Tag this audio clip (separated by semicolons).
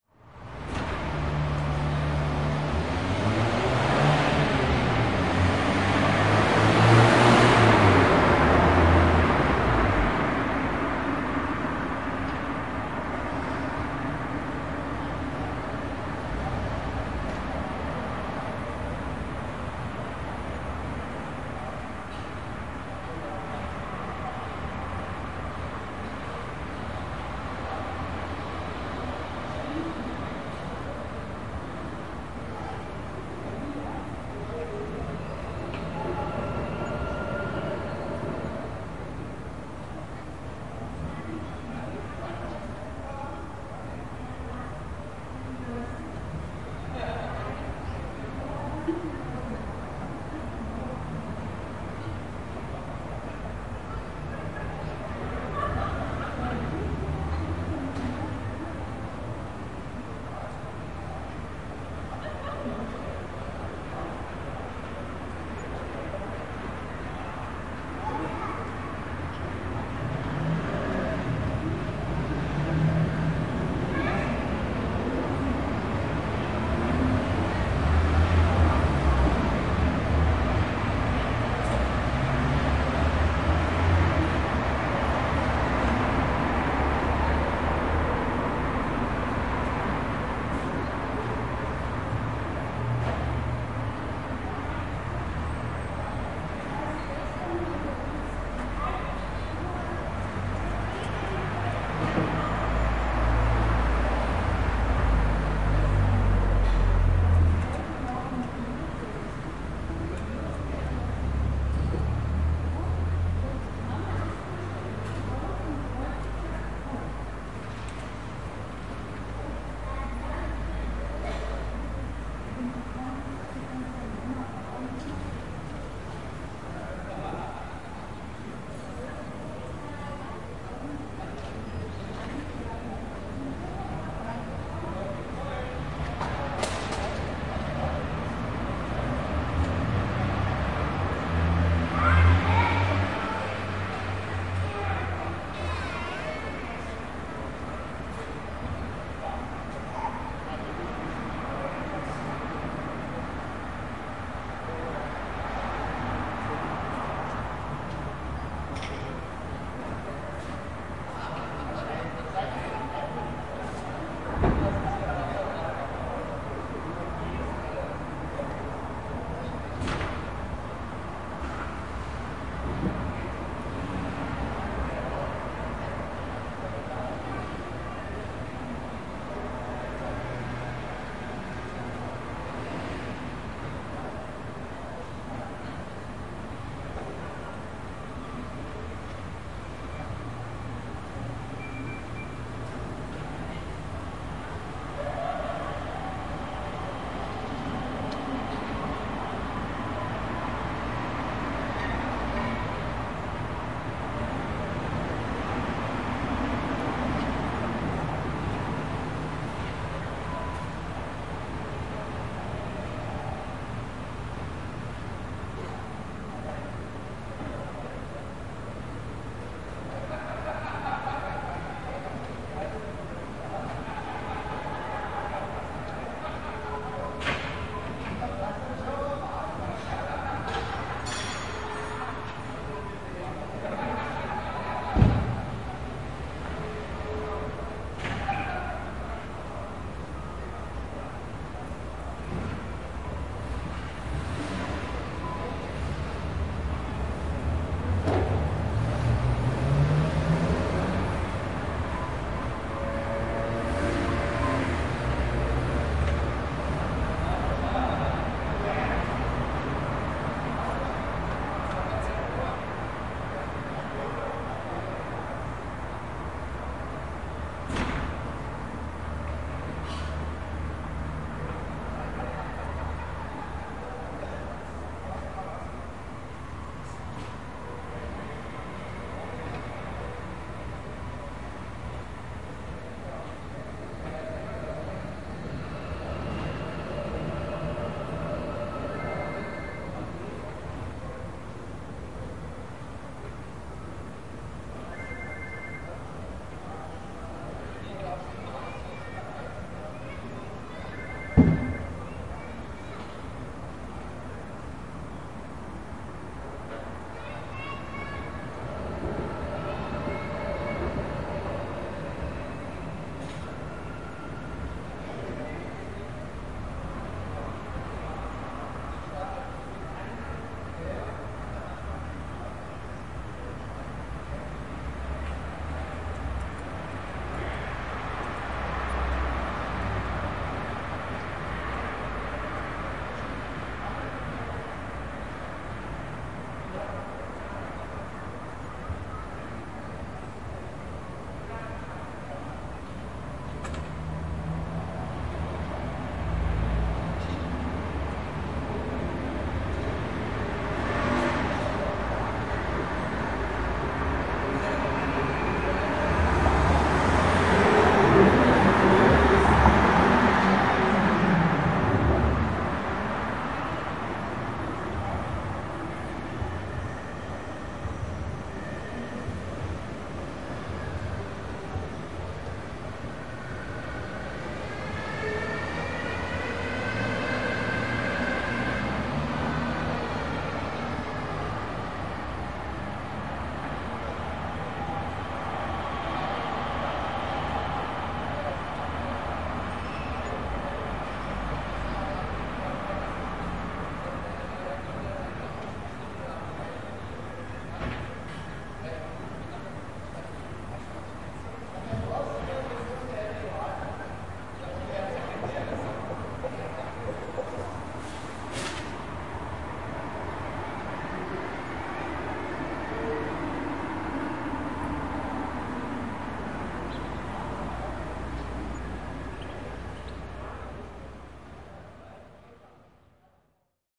field-recording; calm; cars; people; ambience; summer; trees; evenig; voices; street; berlin; city; am; neighbors